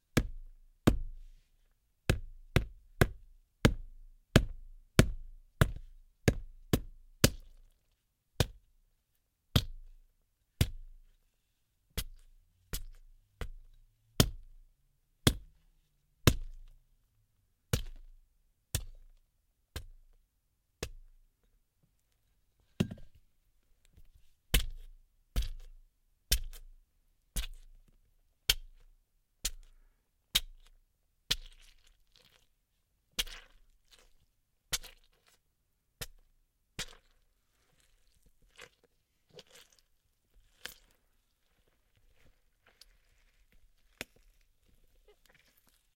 Blunt Force Trauma (Clean and Juicy)
We stabbed some melons to make sound effects for someone being stabbed by a knife.